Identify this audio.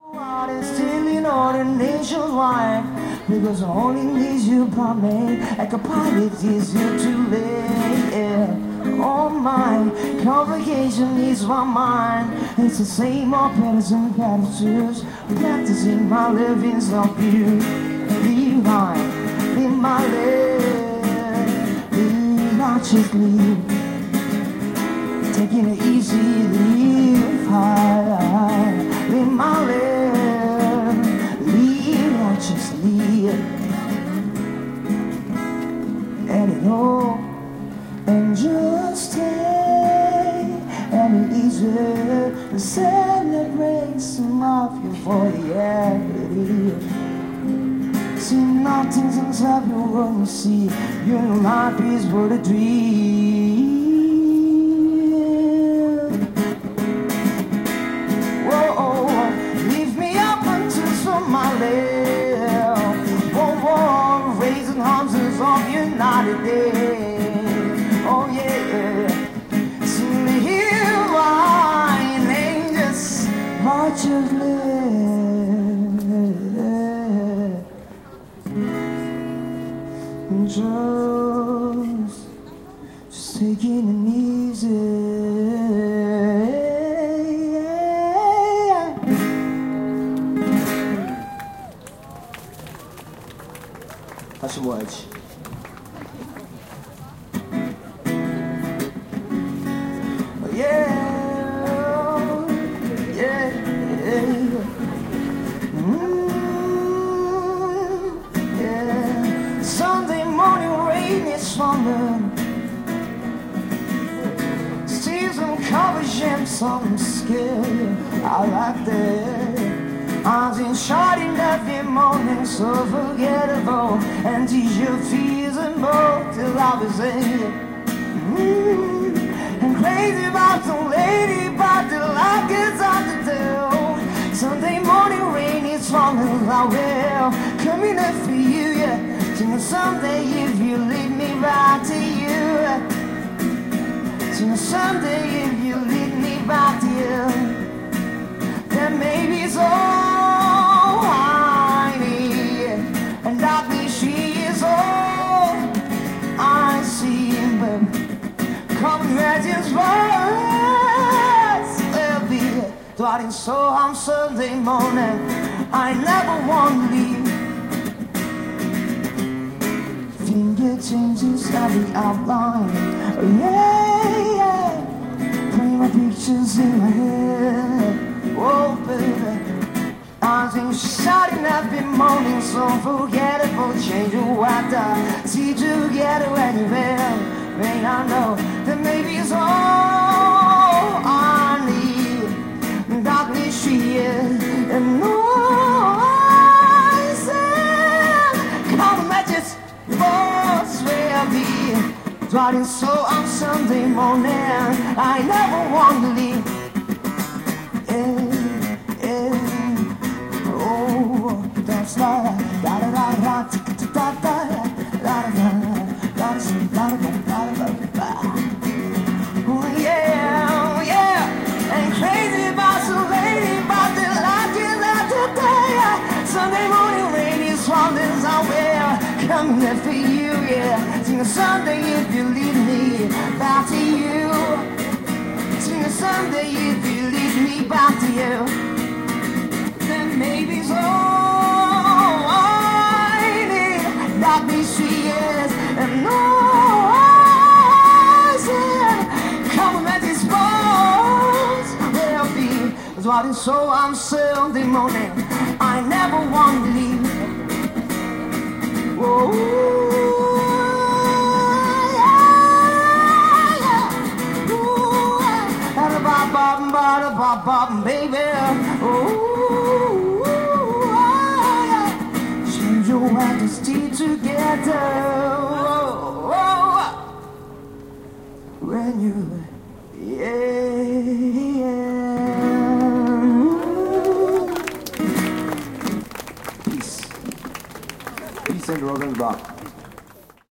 0181 Hongdae music 3
Solo in the street. English songs, talking in Korean. People clap.
20120212